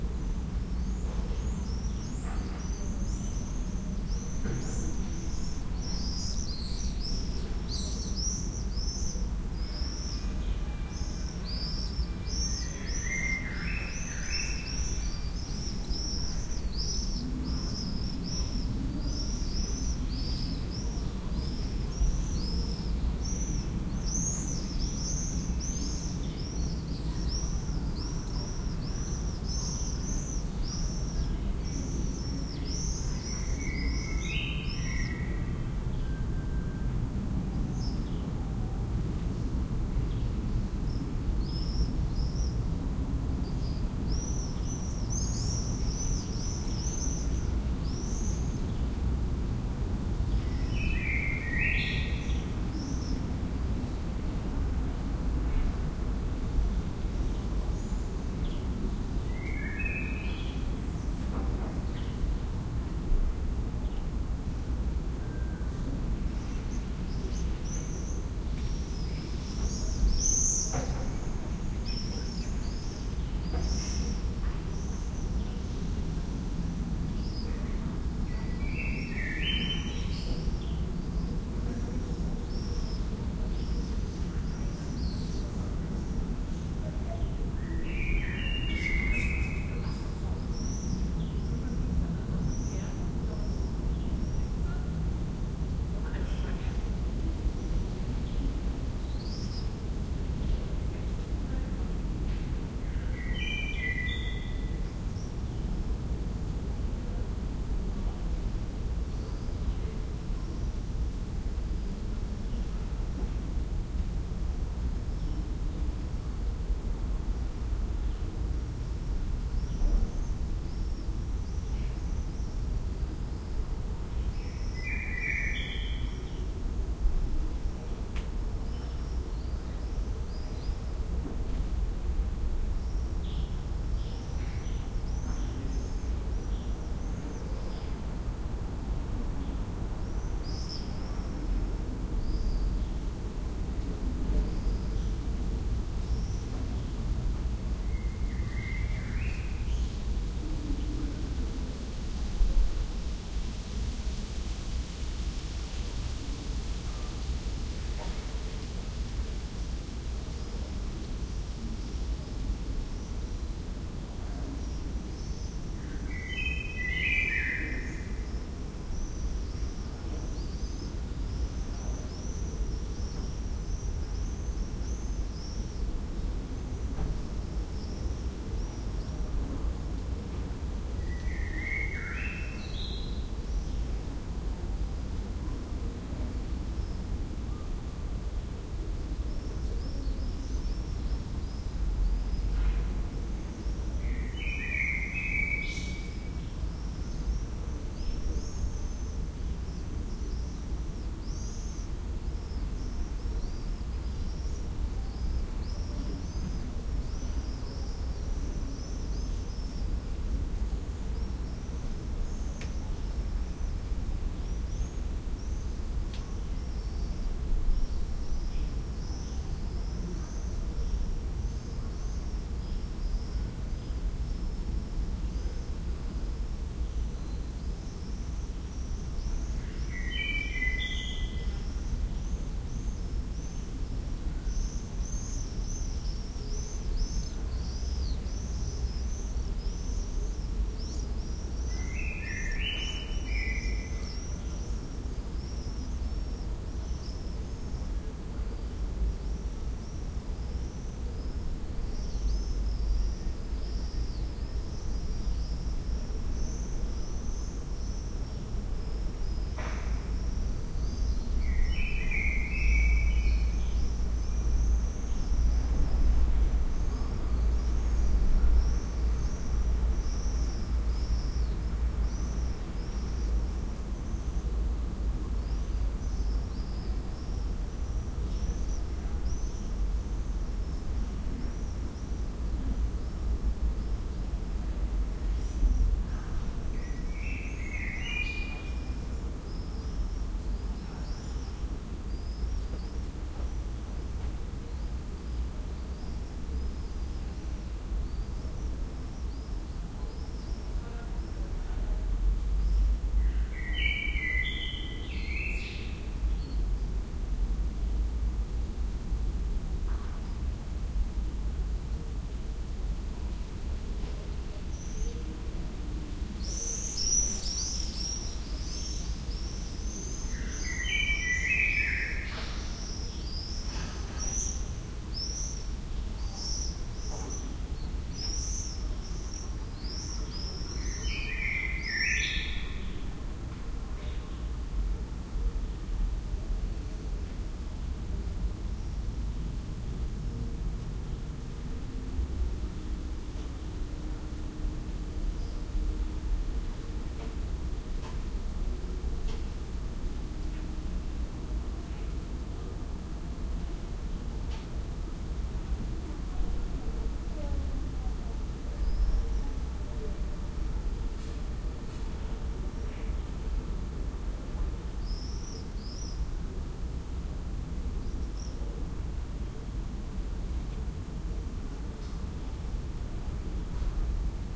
summer on the balcony
balcony, field-recording, summer, test